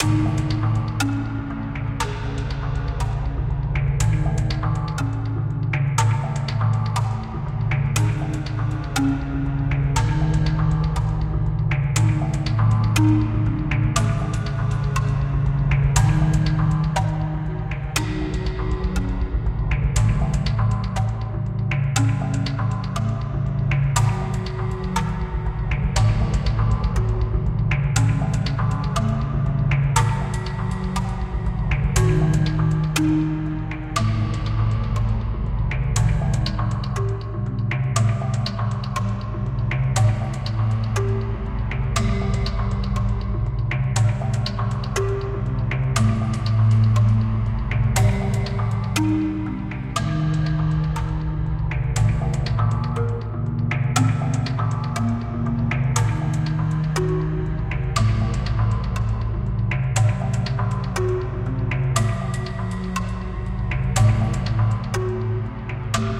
Fish - Cinematic soundtrack background music
atmosphere, background, beat, cinematic, drama, dramatic, electric, electro, film, Hollywood, movie, music, phantom, repetition, soundtrack, suspense, thrill